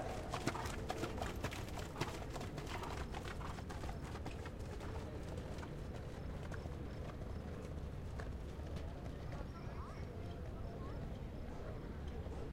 single horse headed to gate 1-2
This is a recording at Arapahoe Park in Colorado of a horse returning to the gate after a jockey change.